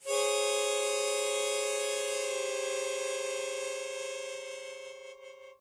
Chromatic Harmonica 3
A chromatic harmonica recorded in mono with my AKG C214 on my stairs.
harmonica, chromatic